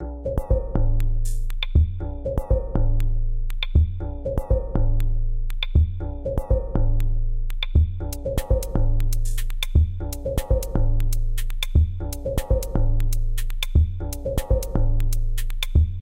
A collection of loop-able sounds from MSFX’s sound pack, “Cassette ‘One’”.
These sounds were sampled, recorded and mastered through the digital audio workstation (DAW), ‘Logic Pro X’. This pack is a collection of loop-able sounds recorded and compiled over many years. Sampling equipment was a ‘HTC Desire’ (phone).
Thank you.